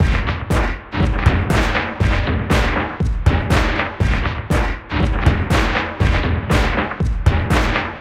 Oil Can't Loop
BPM, Drum, Loop, hop